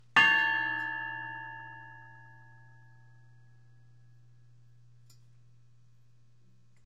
CR BedpostRing2
An old bedpost struck with a small pipe - long ring
metallic, ring, long, clang, bedpost